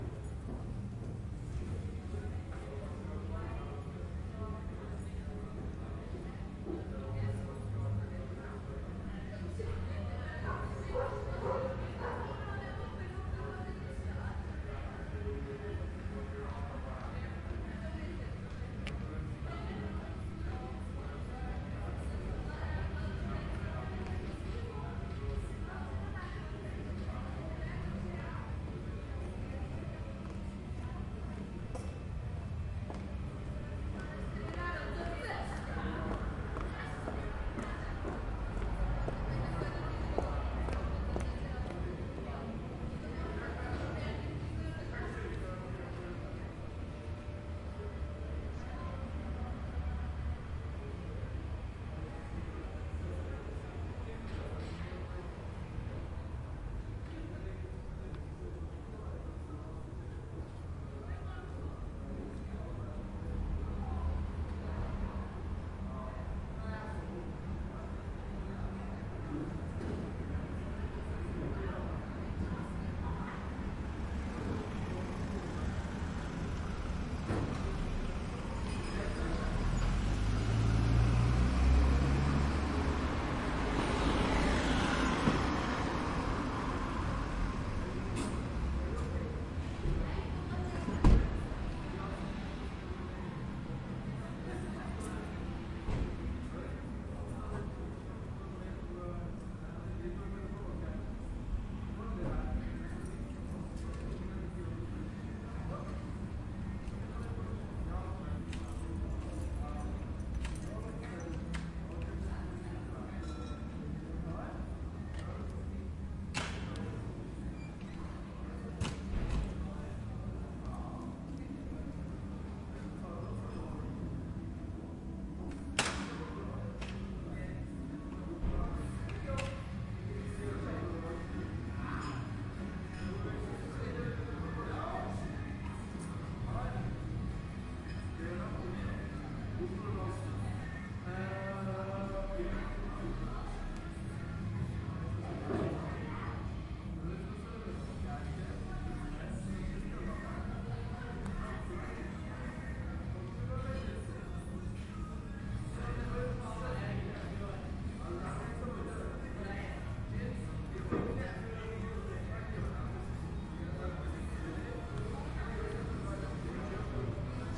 Night in city, some distant traffic and music. On street.
ambience, city, field-recording, music, night, people, street, traffic